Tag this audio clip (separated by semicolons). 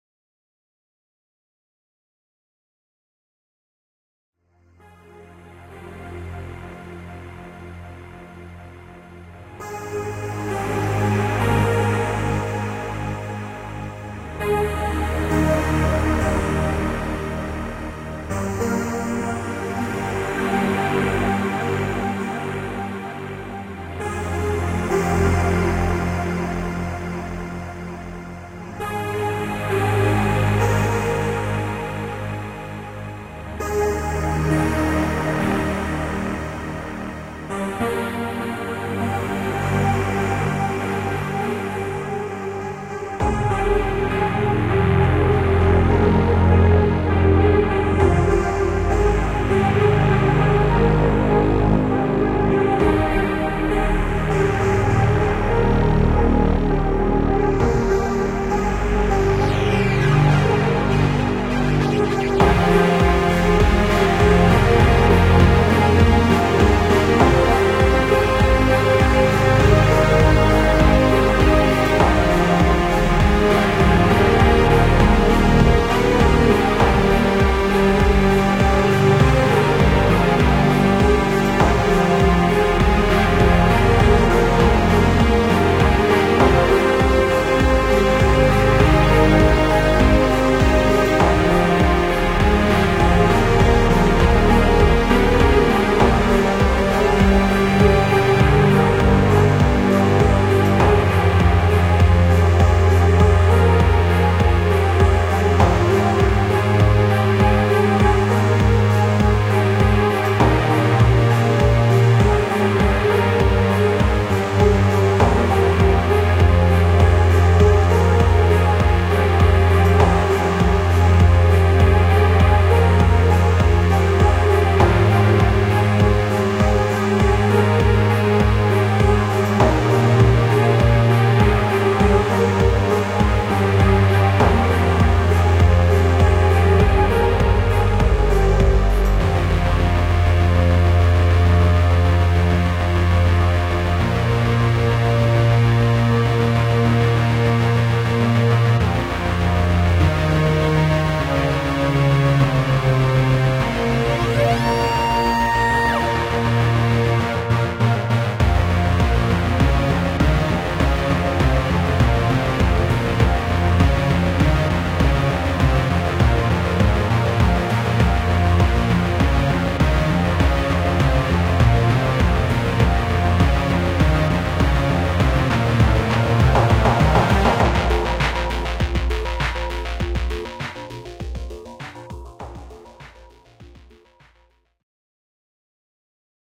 platformer; electronic; music; synth; ndnn; 80s; miamivice; retrowave; 90s; indiedev; electro; song; gaming; games; gamedev; retro; Synthwave; techno; hotline-miami; video-game; action; NeitherDaynorNight; adventure; soundtrack; indiegame